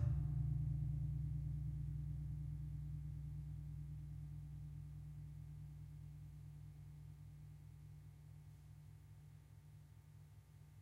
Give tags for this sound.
digitopia; Digit; Java; sica; Gamelan; porto; pia; Casa-da-m; Gamel; o

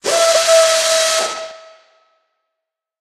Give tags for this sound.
machine; robot; pneumatic

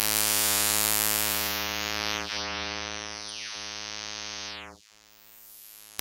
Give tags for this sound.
Image-To-Sound; Sound; Soundeffect

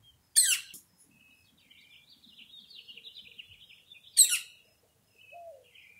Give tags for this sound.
morning-dove; bird; birdsong; northern-flicker; field-recording; birds

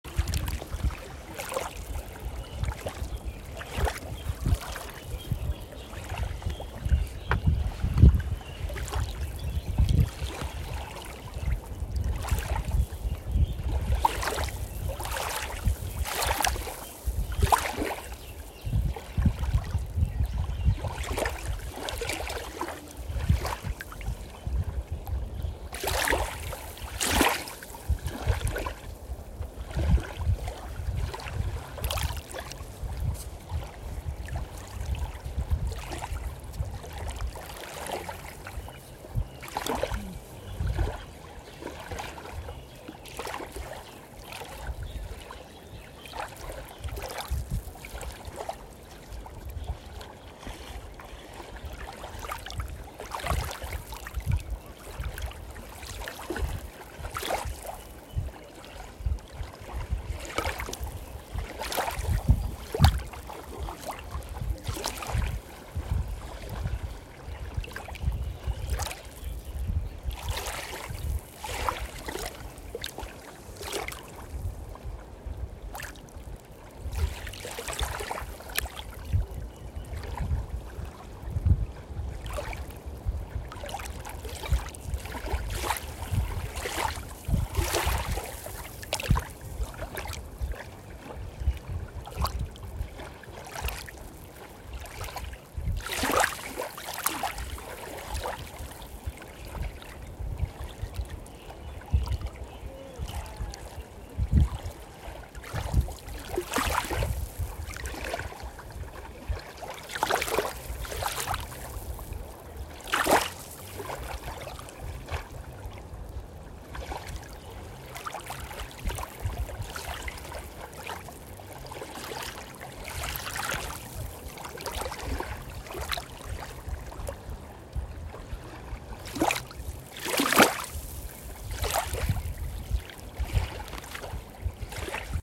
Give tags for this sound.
crowd; water; beach; small; waves; shore; field-recording; sea; seaside; coast; ocean

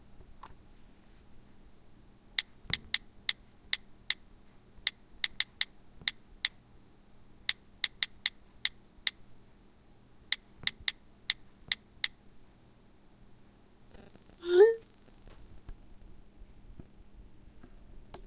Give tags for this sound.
Text; sound; i-phone

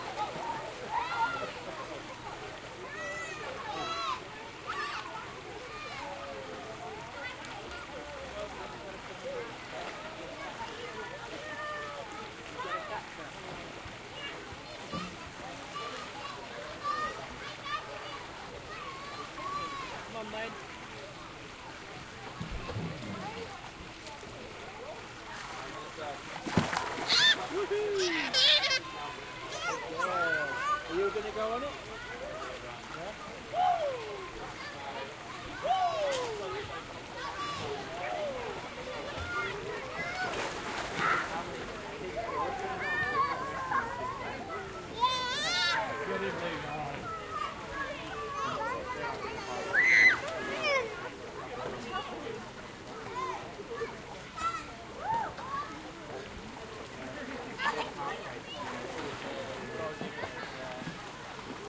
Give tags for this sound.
fun; swim; ambience; splash; pool; water; swimming

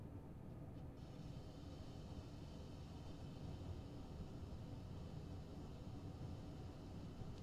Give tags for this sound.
ambient; house; indoors; neighbours; room; tone

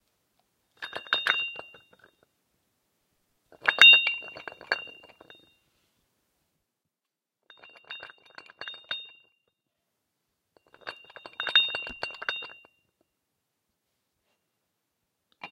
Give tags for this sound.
turn; off; lamp; light

